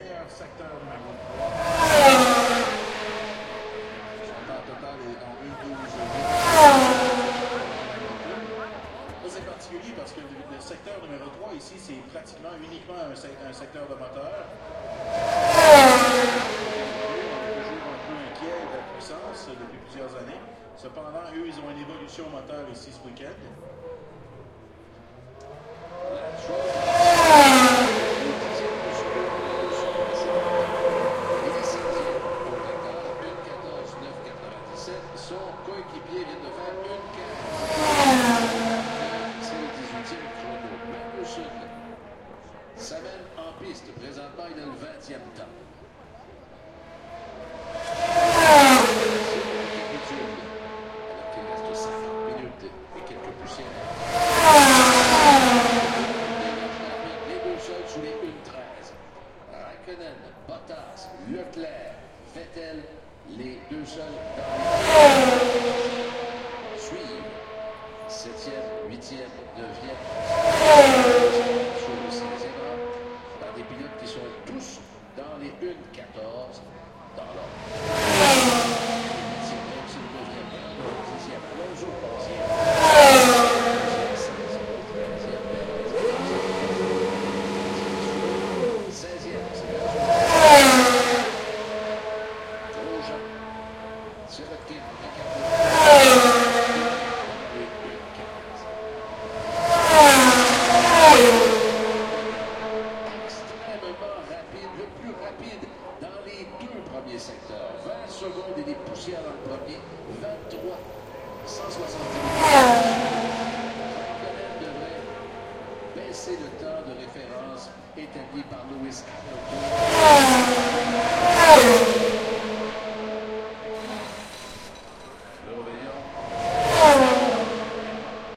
Recorded from Grandstand 1, Circuit Gilles Villeneuve, Montreal GP Practice 1
F1, Formula-one, Montreal